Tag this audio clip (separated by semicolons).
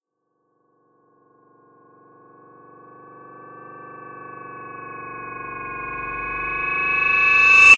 build; metallic; processed; reverse; riser